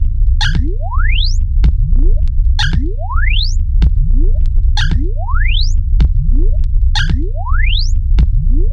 8bit110bpm-09
The 8 Bit Gamer collection is a fun chip tune like collection of comptuer generated sound organized into loops
atari, com, Bit, loop, 8, game